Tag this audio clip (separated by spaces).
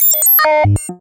blip computer sound